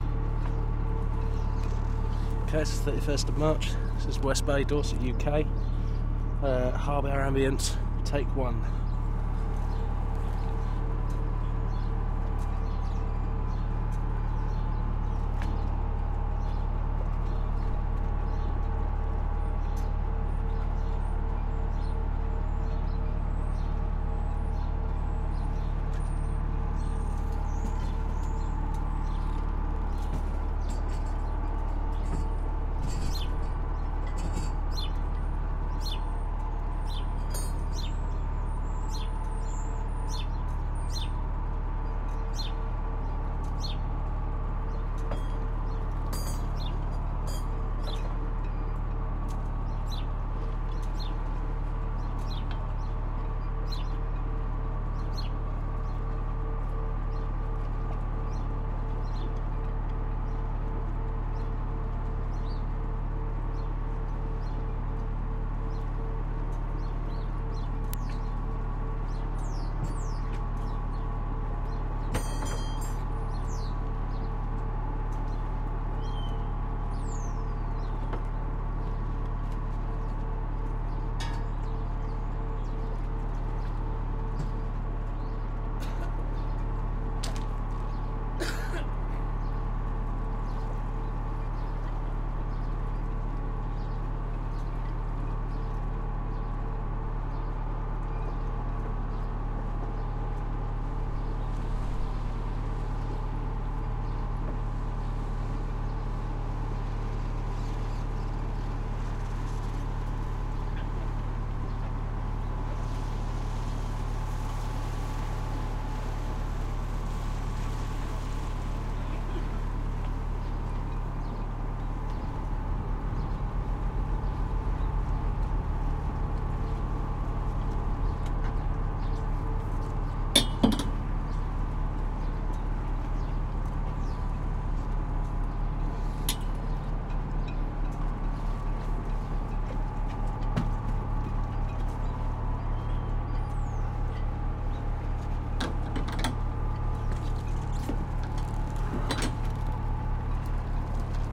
CFX-20130331-UK-DorsetHarbour01
Small Harbour Ambience
Ambience, Harbour, Small